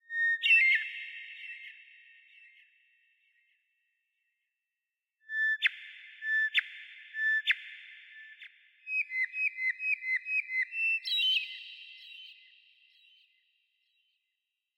Krucifix Productions birds chirping in the unknown
birds chirping sound effect
birds, chirp, chirping, forest, nature, trees